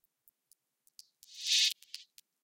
Hi-pass filtered minimal background noise thinger.